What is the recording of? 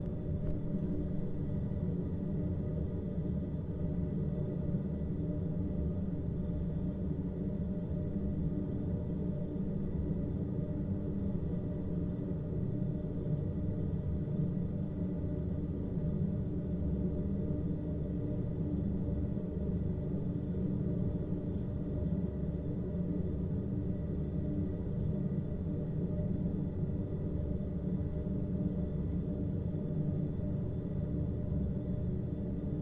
Drone at Rio Vista for upload
Ventilation sound in small room of an historical building in Mildura Australia. First noticed the drone years ago but didn't have a portable recorder. Thought it would would be a great background track for a dramatic scene.
dramatic, drone, location-recording, ventilation-shaft